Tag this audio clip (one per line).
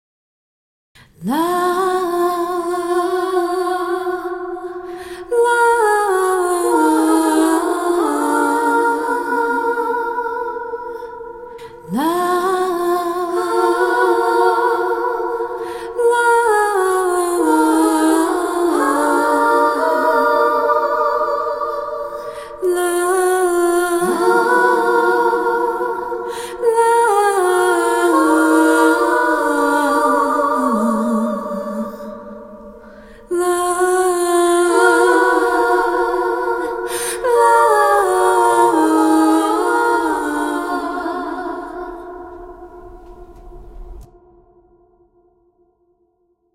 90bpm
acapella
singing